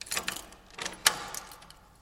Clattering Keys 02
clattering, metal, motion, rattle, rattling, shake, shaked, shaking